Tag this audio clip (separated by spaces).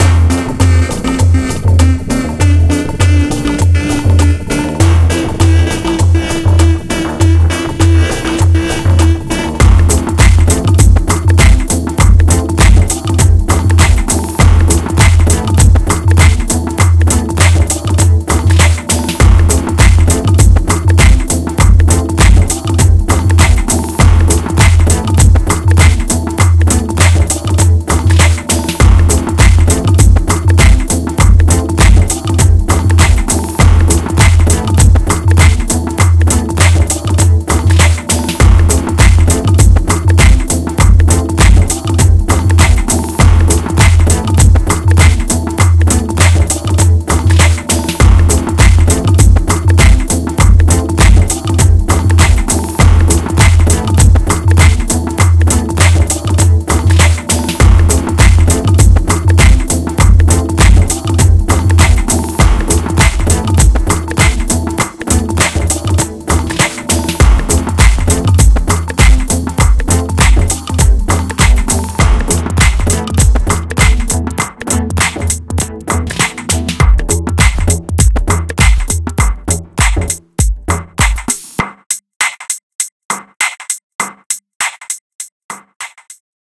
drum bass synth techno electronic tekno beat hard bassloop dance trance electro loop